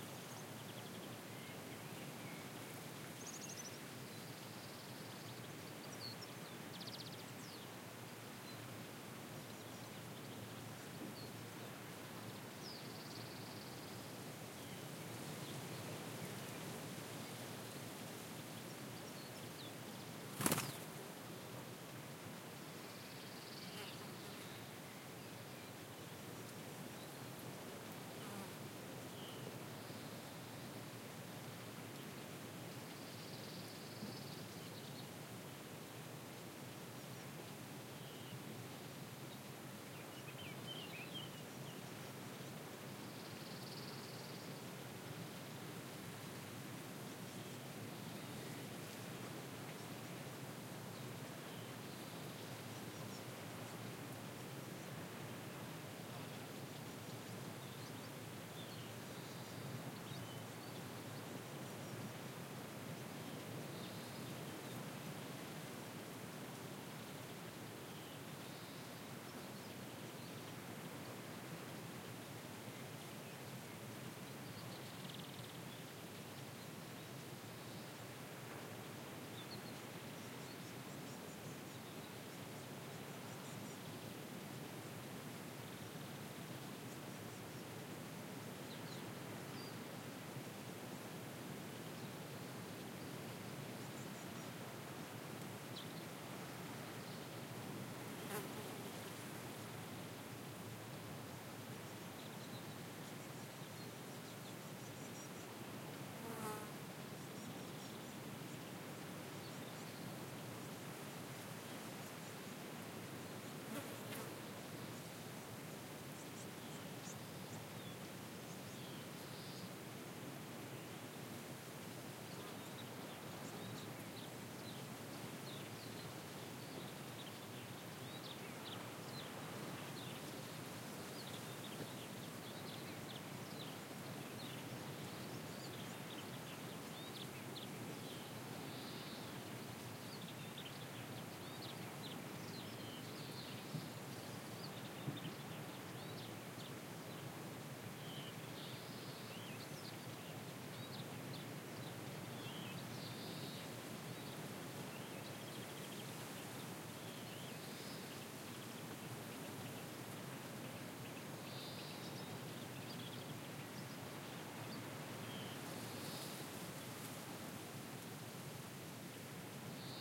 ambience on the nature, a bird starts flying
h4n X/Y